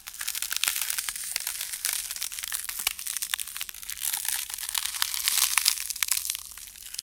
ice grinding cracking freezing designed
cracking, freezing, grinding, ice